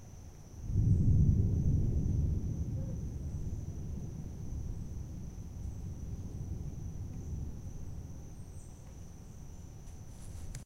Low Rumble
Equipment: Tascam DR-03 & diy wind muff
A low thunder rumble recently recorded during a thunder storm.
bang, bass, crackle, lightning, low, rumble, storm, thunder, weather